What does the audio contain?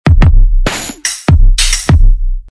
Ring Around The Rosie Drum
the drum pattern reminds me a lil of that game.